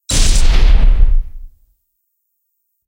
Unrelenting Shotgun
A nice shotgun sound that packs a punch
Recorded with Sony HDR-PJ260V then edited using Audacity and Flstudio